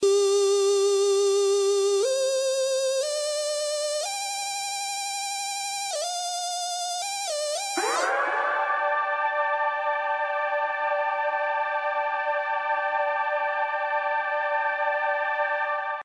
doa lead 120bpm
house, loop, riff, techno